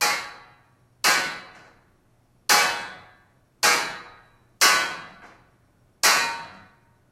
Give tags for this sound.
bang
pipe
medium

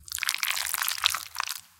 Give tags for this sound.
food
mash
mix
stir
slimy
squelch
slime

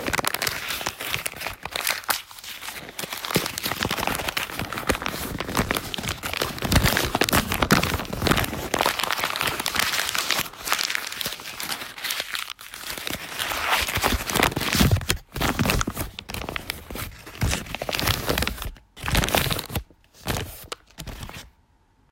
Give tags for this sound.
paper
kneading
noise

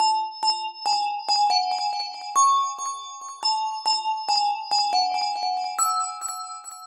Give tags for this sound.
Wave
Trap
House
DnB
Ambient

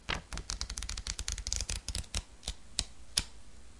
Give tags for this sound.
browsing
noise
slow

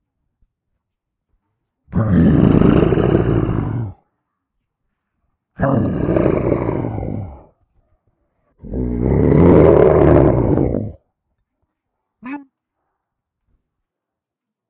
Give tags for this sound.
beast
growl
monster

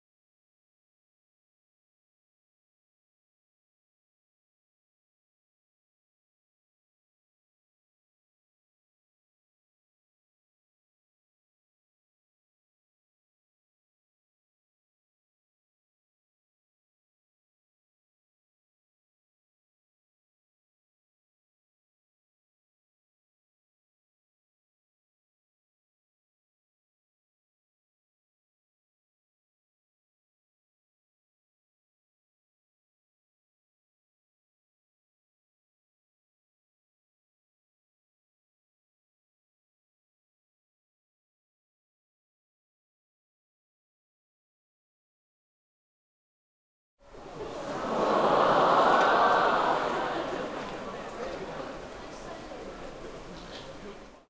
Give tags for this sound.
applause
crowd
human
indoor
theatre

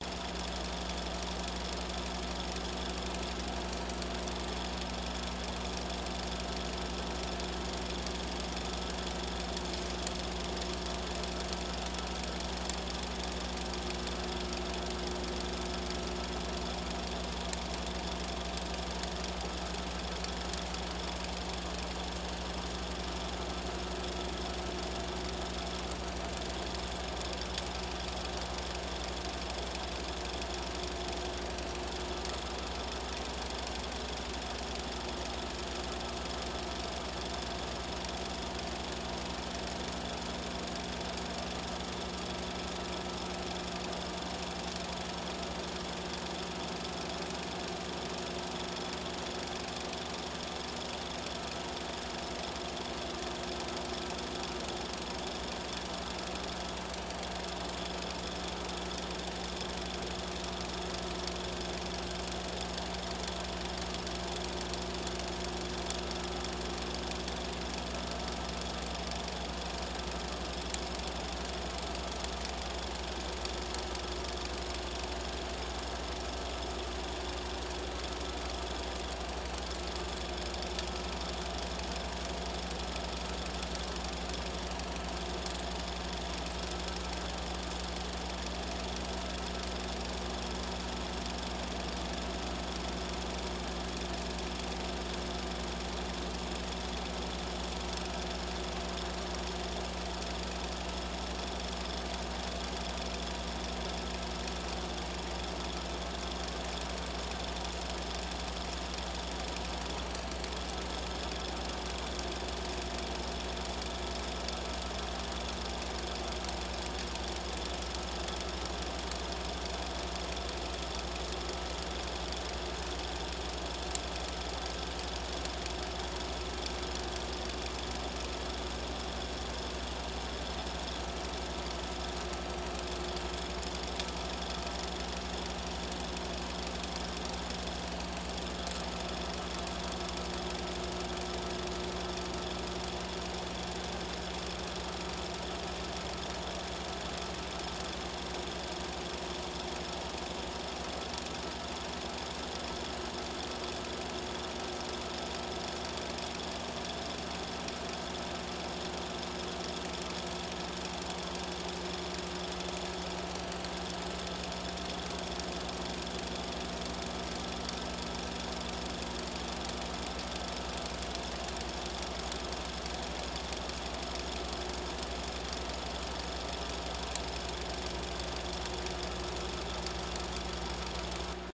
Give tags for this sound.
8-milimeter
8mm
background
background-noise
film-projector
live-recording
movie-theatre
projector
screen

